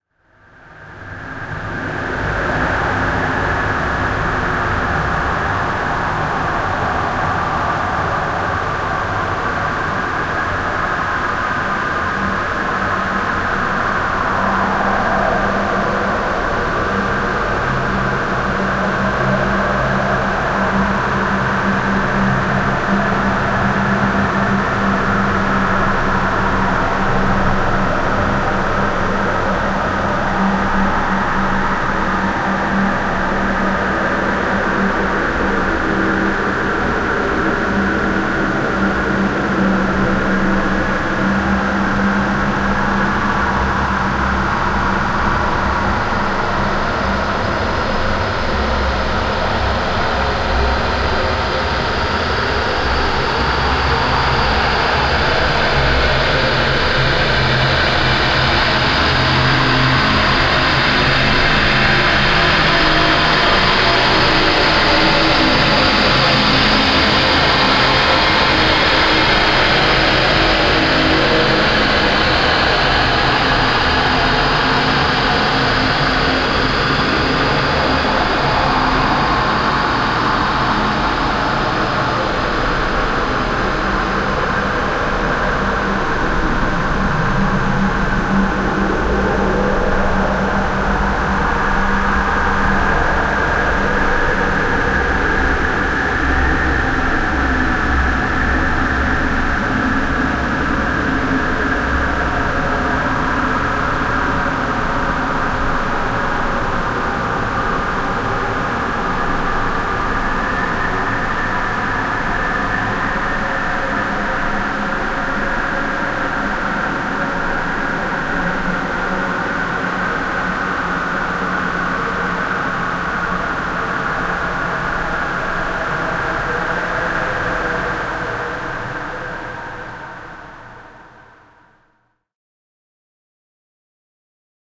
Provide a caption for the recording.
Wind Arid Tempest
Synthetic wind atmosphere created in Reaktor Space Drone, layered and mastered in Logic 7 pro channel EQ, with resonant filter peak automation
wind, arid, tempest, competition, synthetic, atmosphere